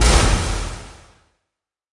Medium Explosion
bang, boom, explosion, explosive